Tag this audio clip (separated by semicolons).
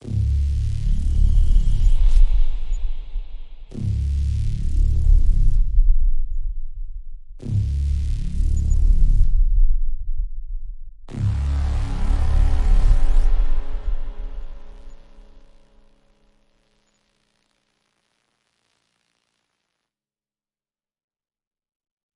Aliens; Epic; Intro; SciFi; Sound; Space